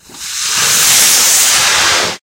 firework rocket ignition
Ignition sound of a firework rocket
firework, fireworks, ignition, launch, new-years, rocket, stereo